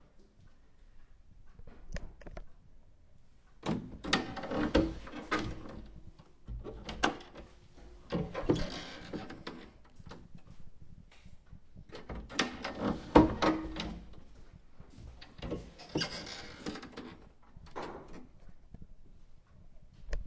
kitchen-door
A very creaky kitchen door hinge.